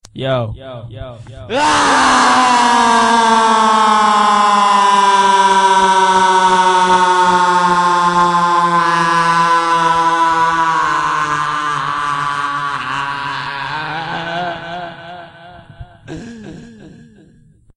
loud drawn out echoing scream
this is just a scream i recorded for a song a few years ago and decided to upload here
yell, annoying, echo